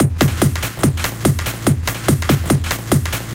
rave beat
hardcore, club, techno, dance, rave